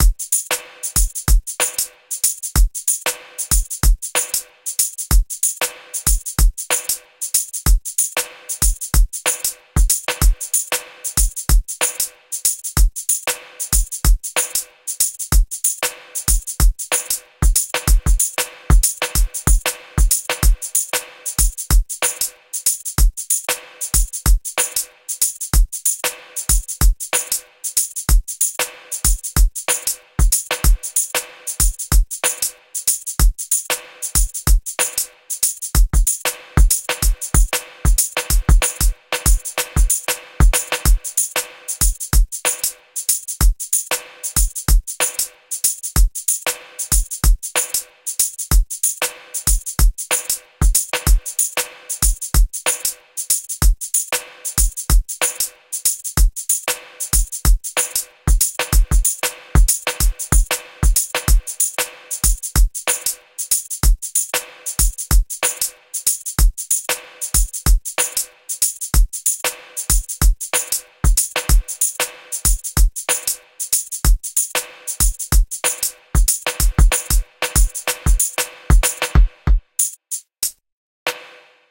Funky groove 1
This is a 32 bar long hiphop beat with traces of funk and reggae influences in it. The loop has 5 different parts or patterns so to say. The last bar in it contains the samples one by one.
funk, beat, hihat, kick, backbeat, swinging